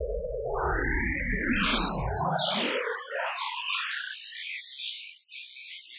Made with image synth, supposed to be spatial...